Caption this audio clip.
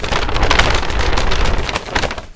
a grocery bag being shaken